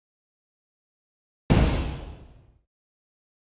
Door Slamming
A heavy metal door being slammed in a rather larger corridor. Recorded with a Rode NTG-1 quite far away to capture some room tone
metal, perc, percussion, reverb, door